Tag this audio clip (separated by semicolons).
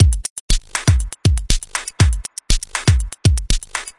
beats; drum; free